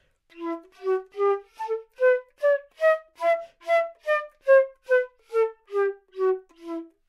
Part of the Good-sounds dataset of monophonic instrumental sounds.
instrument::flute
note::E
good-sounds-id::7244
mode::natural minor
Intentionally played as an example of bad-articulation-staccato